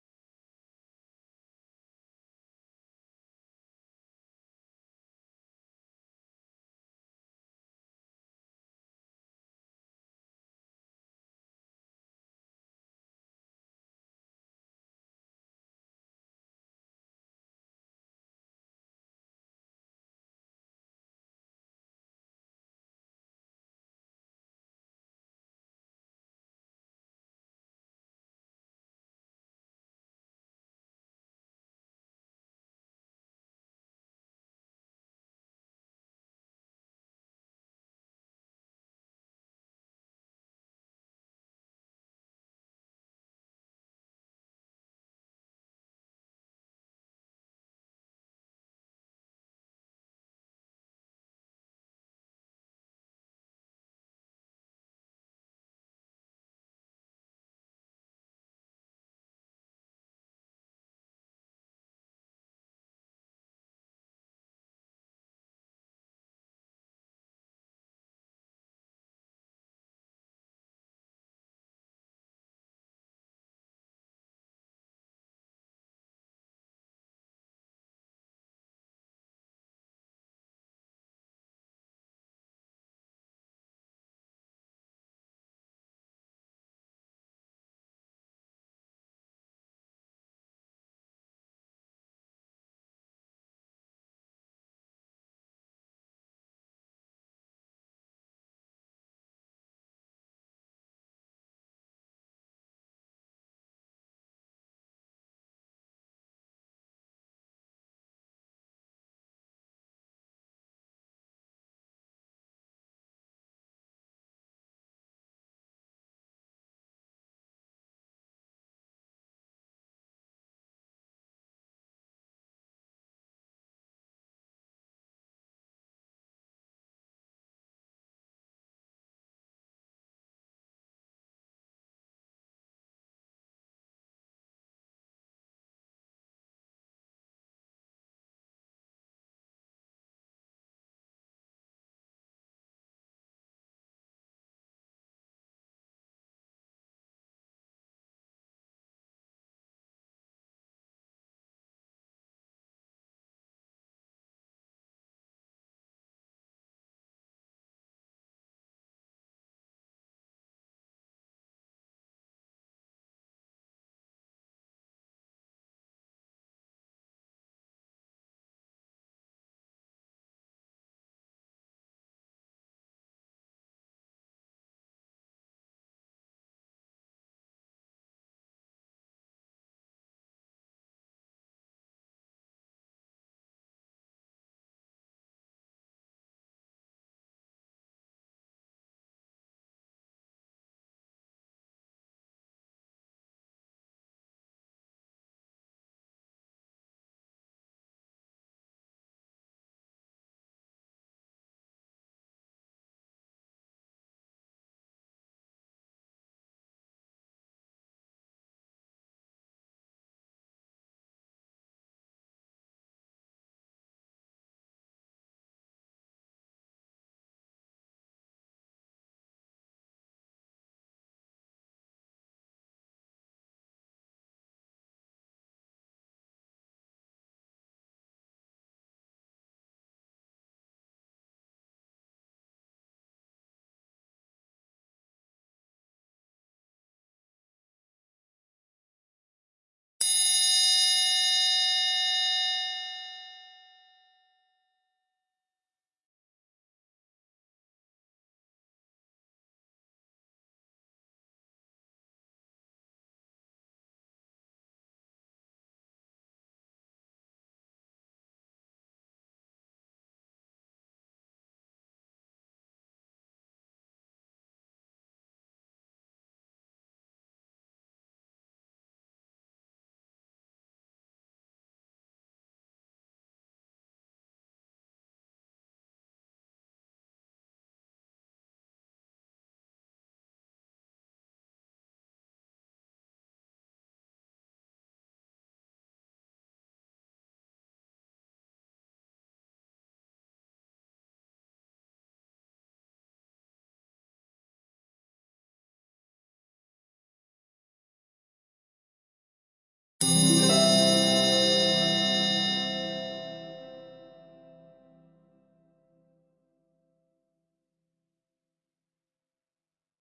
5-Minute-Timer
A 5 minute silent timer with 1 minute warning chime and harp strum at 5 minutes
chime, harp, timer